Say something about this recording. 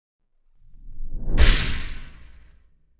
This is my competition entry for Earth. It is comprised of copies of a kick drum recording and a high hat recording I've done with some phase vocoder processing. This short sound is really about 7 tracks of layered audio.
contest, field-recording, competition, earth, effects-processing